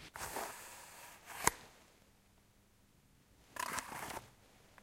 A small knife taken out of its leather case and put up again.
case, knife, leather